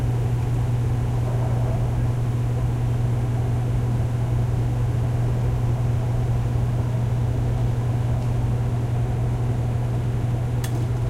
laundromat washer washing machine rumble3
laundromat, machine, rumble, washer, washing